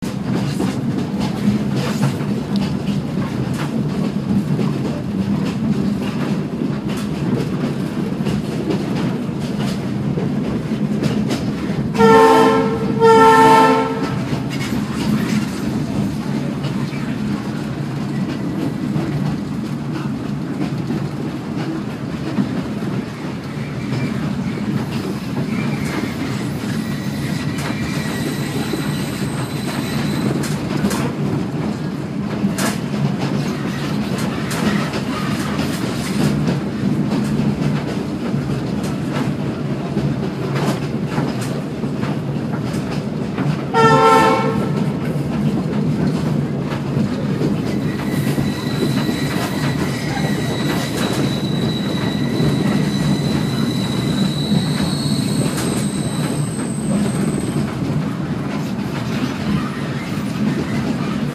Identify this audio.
Tren Ollantaytambo a Machu Picchu, Cuzco, Perú
Train sounds, this train transport passengersfrom Ollantaytambo to the Machu Picchu Sanctuary ruins, in Perú.
City, Country, town, Trains, Travel